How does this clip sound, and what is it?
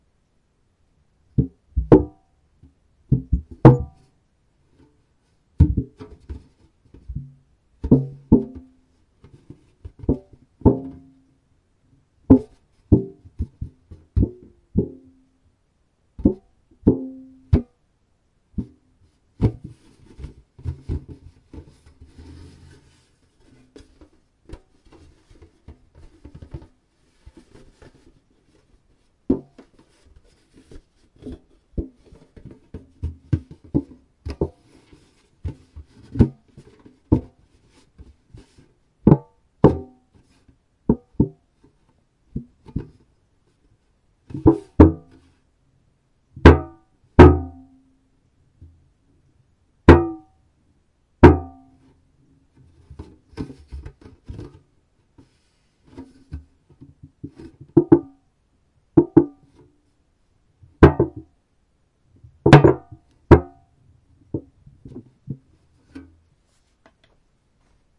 Weird sounds of a big metal tin being handled and pressed. Recorded indoors on a Zoom H1n.